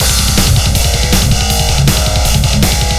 Speed metal drums and guitar riff with high distortion.
drumloop, drum, guitar, metal, distortion, 160-bpm, distorted